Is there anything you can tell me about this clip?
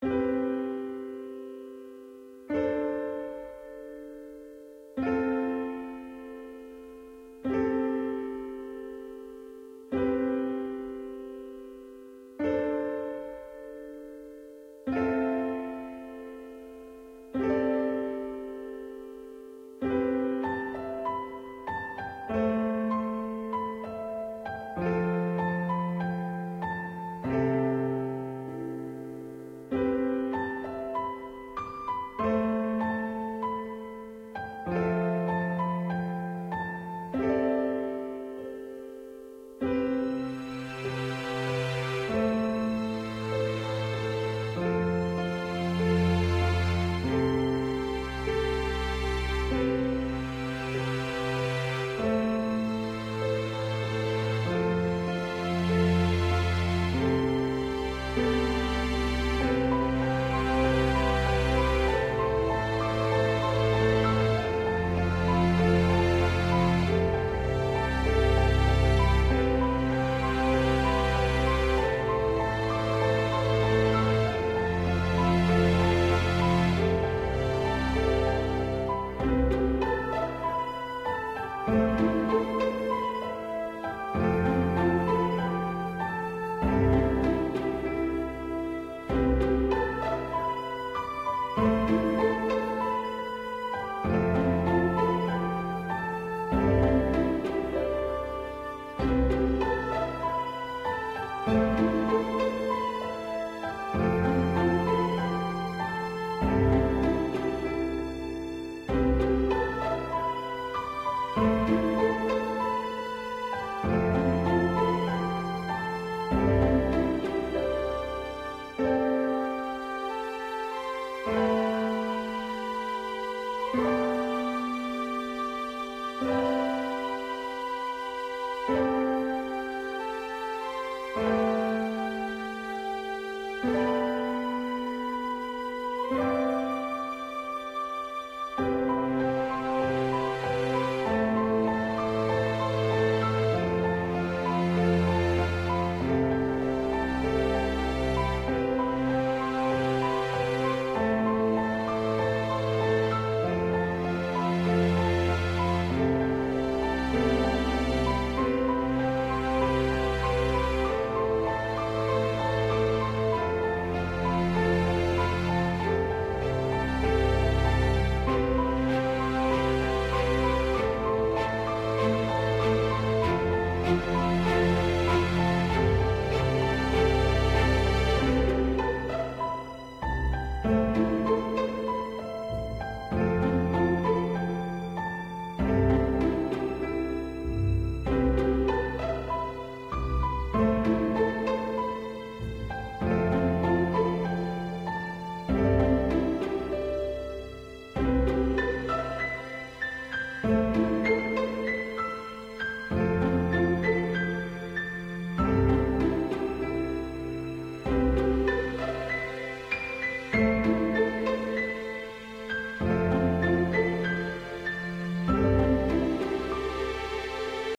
Calming Background Music Orchestra
Genre: Calming, Orchestra
One of my old composition.